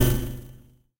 An electronic sound, usefull as percussion sound for a synthetic drum kit. Created with Metaphysical Function from Native
Instruments. Further edited using Cubase SX and mastered using Wavelab.